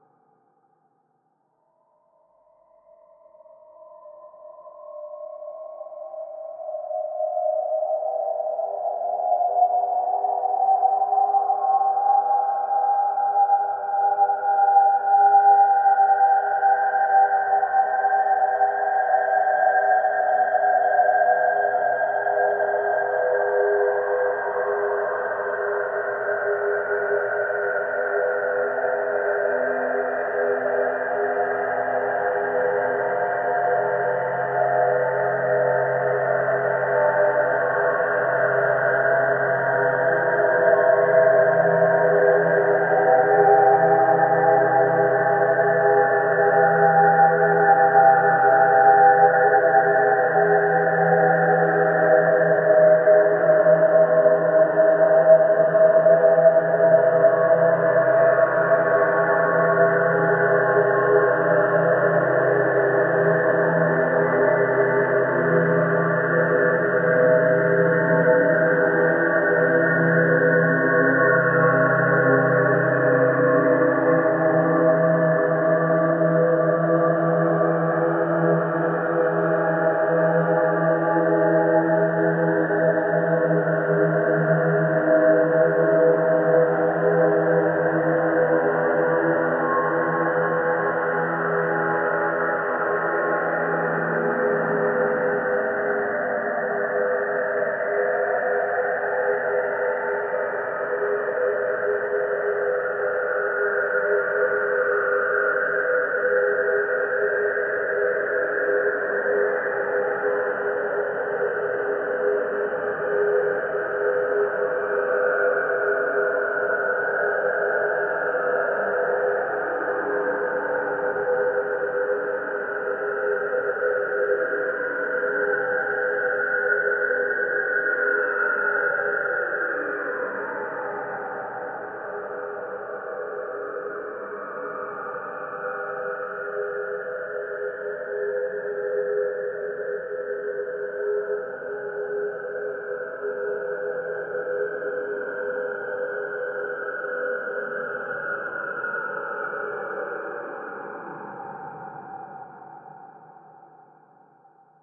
LAYERS 009 - UltraFreakScapeDrone - G2
LAYERS 009 - UltraFreakScapeDrone is an extensive multisample package containing 97 samples covering C0 till C8. The key name is included in the sample name. The sound of UltraFreakScapeDrone is already in the name: a long (over 2 minutes!) slowly evolving ambient drone pad with a lot of movement suitable for freaky horror movies that can be played as a PAD sound in your favourite sampler. It was created using NIKontakt 3 within Cubase and a lot of convolution (Voxengo's Pristine Space is my favourite) as well as some reverb from u-he: Uhbik-A.
ambient, artificial, drone, evolving, freaky, horror, multisample, pad, soundscape